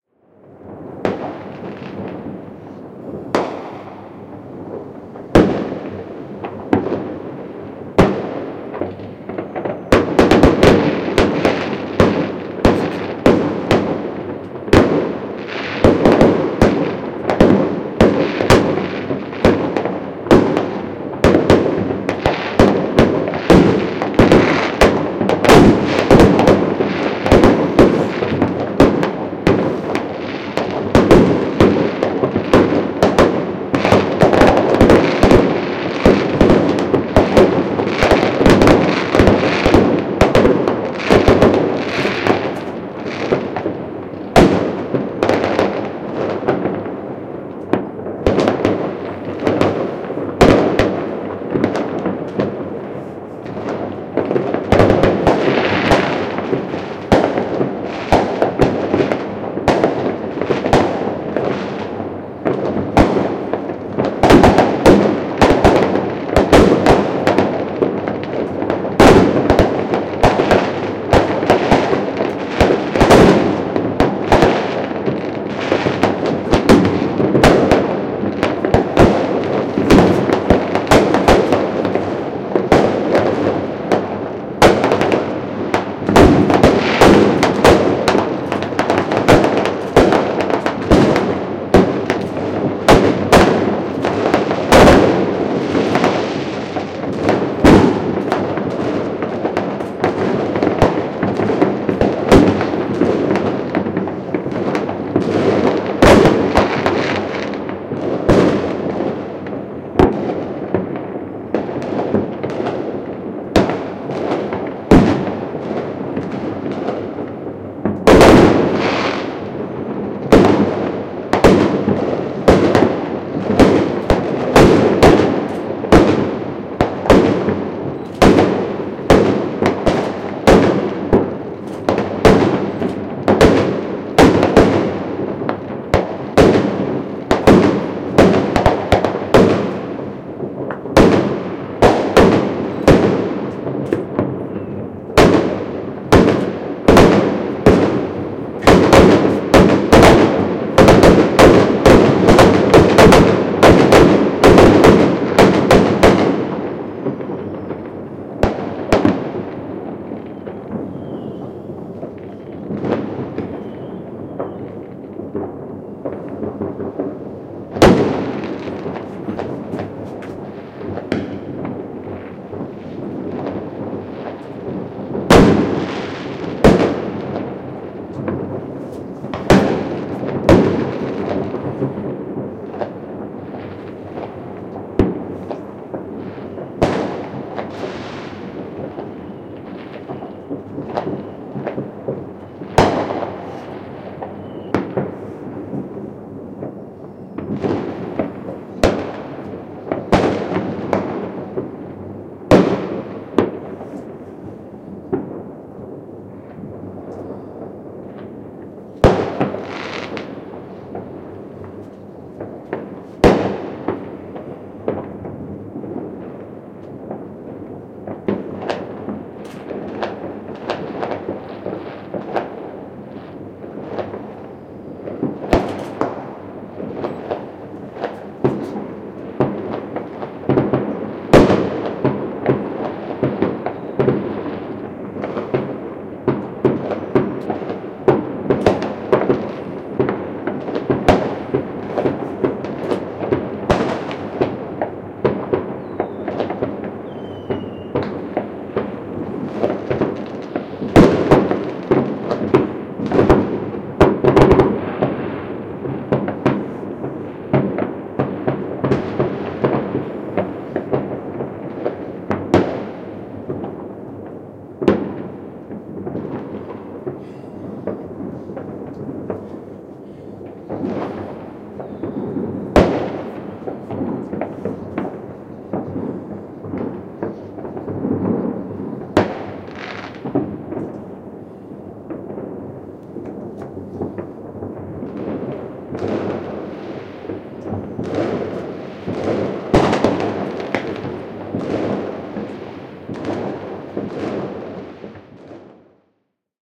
Ilotulitus, raketit / Fireworks, rockets on New Year's Eve around midnight and right after
Ilotulitus uudenvuoden aattona Helsingissä lähiössä keskiyöllä ja sen jälkeen. Ilotulitusrakettien vilkasta pauketta lähellä ja kauempana. Suomi 100 v.
Äänitetty / Rec: Zoom H2, internal mic
Paikka/Place: Suomi / Finland / Helsinki
Aika/Date: 01.01.2017
Celebration, Field-Recording, Finland, Finnish-Broadcasting-Company, Firework, Fireworks, Ilotulitus, Juhla, Juhlinta, New-Year, Pauke, Raketit, Raketti, Soundfx, Suomi, Tehosteet, Uusivuosi, Yle, Yleisradio